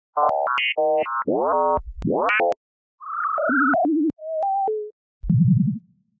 Droid Communications
Synthesised robot sounds using Andy Farnells (Designing Sound) Pure data patch for robotic sounds
Beeps, Communicate, Computer, Droid, Glitch, Machine, Robot, Speak, Speech